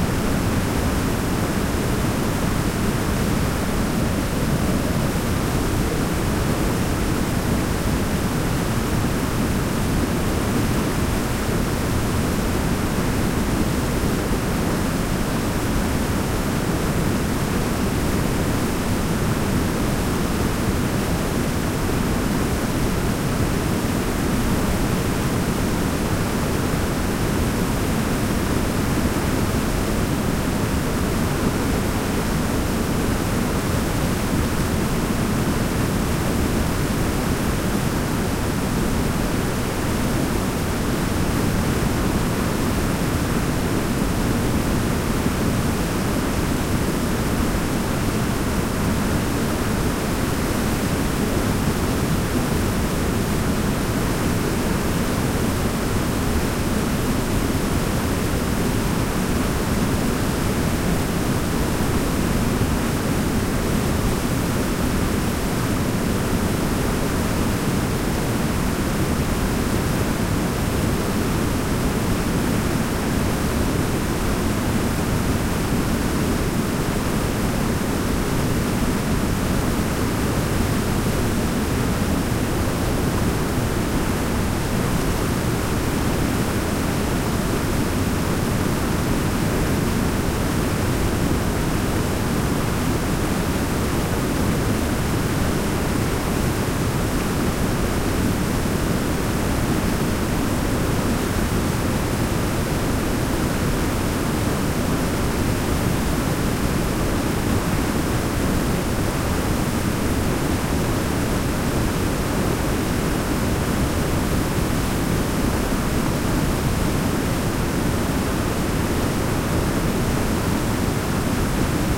I sat in the middle of a waterfall on a large rock in the middle of the river Grövlan in northern Dalarna in Sweden and recorded its noise with two of Line Audio's OM1.
fall, nature, river, stream